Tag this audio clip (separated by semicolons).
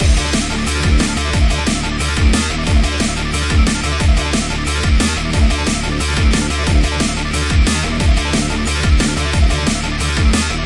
drums; fast-paced; guitar; loop; loops; metal; music; Rock; song